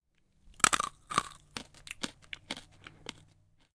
Crunchy Chew
A recording of me chewing the last bit of a lozenge.
First an open mouthed crunch is heard, followed by another. This transitions to closed mouth chewing noises mixed with lip smacking noises. The clip ends with a slight sound of a car driving by in the background.
Recorded using a H4n Zoom recorder.
Chew, Chewing, Crunch, Crunchy, Eating, Food, Lip, Lozenge, Mouth, Smacking